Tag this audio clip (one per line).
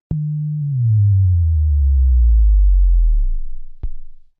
down
off
power
sci
turn